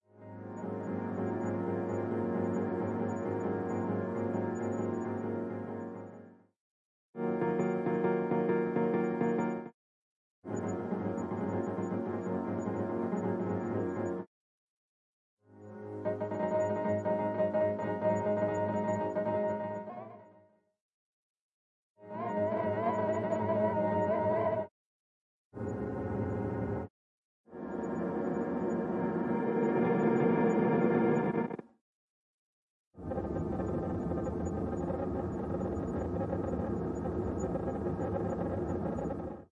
Ambiance Grain Sound Effects
Created a few different grain effects created with a Aminor sample, looped in certain areas with grain freeze max for live device in ableton suite..